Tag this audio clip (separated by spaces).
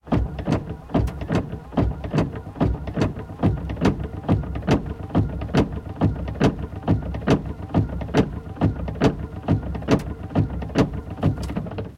rain wipers